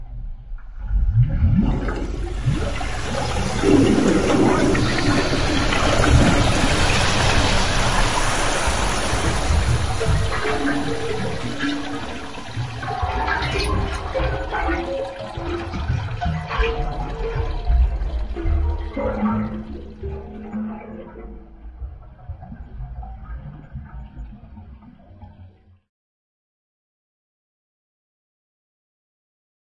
A collage of sampled water sounds - emerging to a big splasch-gulp-whoosh and releasing with metallic water-in-tubes-sounds...
pipes, Splash, Blubbering, Tube